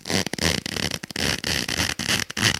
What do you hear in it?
Creepy Shoe Sound
creepy, shoe